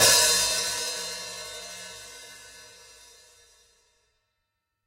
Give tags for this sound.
drum heavy hi-hat hihat kit metal rockstar tama zildjian

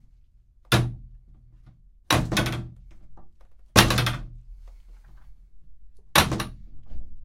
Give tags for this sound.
car crash hit

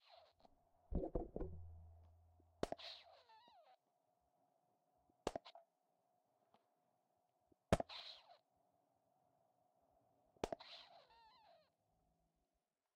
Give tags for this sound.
Bottle Water Splash

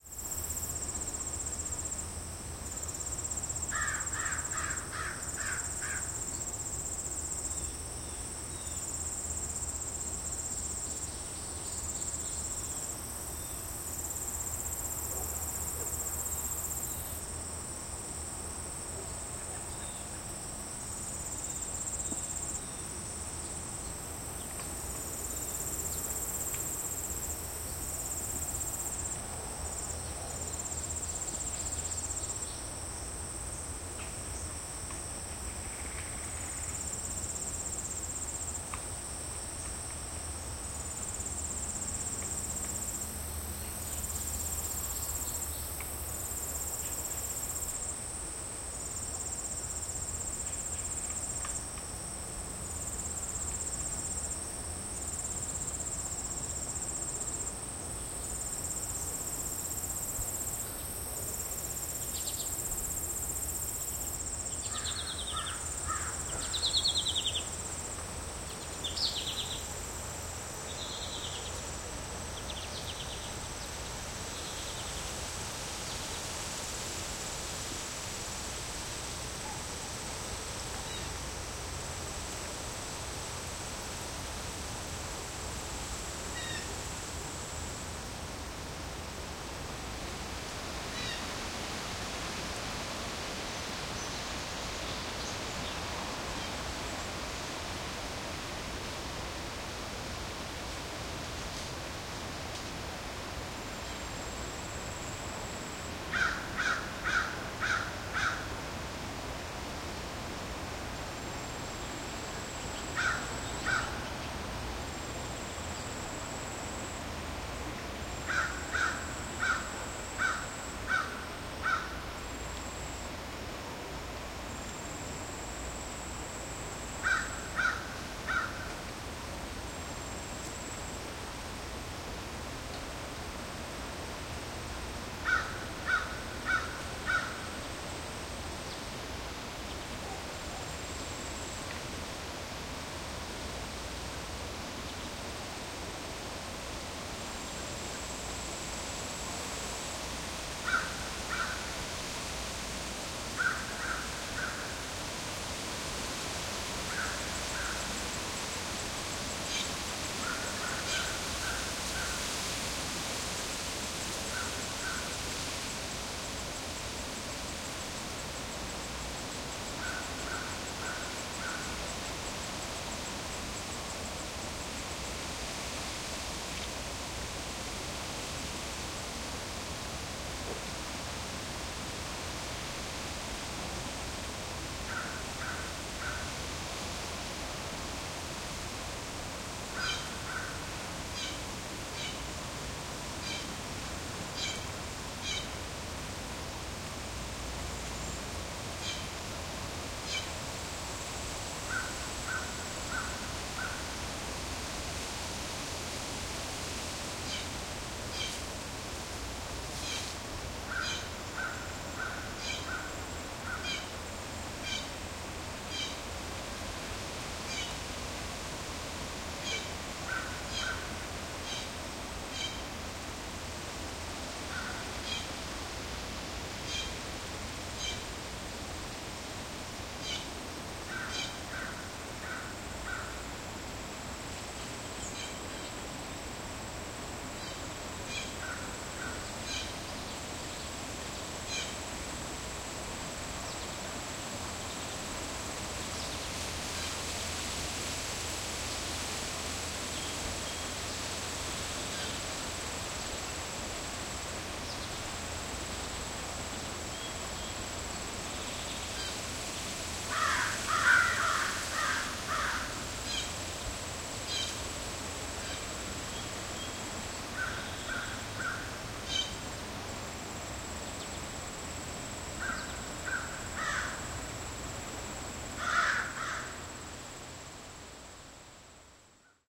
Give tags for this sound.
ambience birds field field-recording insects nature summer

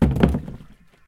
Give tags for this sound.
falling metal-clangs

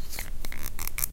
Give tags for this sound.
dry cane tool reed mandrel squeak double-reed wood bassoon-reed bassoon

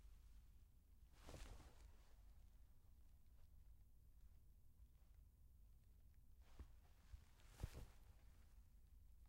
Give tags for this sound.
cloth pass foley

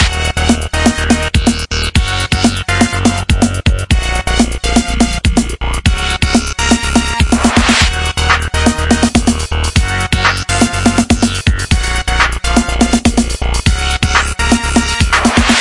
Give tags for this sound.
retro uptempo 90s 123bpm Eb breakdance breakbeat minor figure

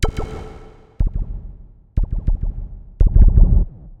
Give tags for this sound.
alien bwah design laser pop

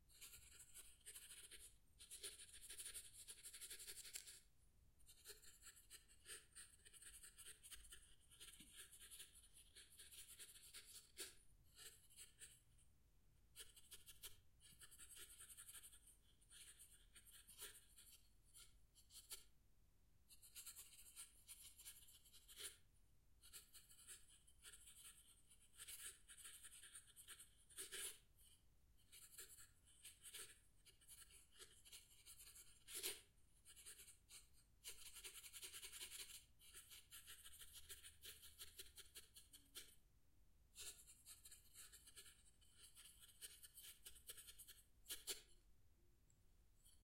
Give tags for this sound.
edited paper scribble pencil edit drawing write signature

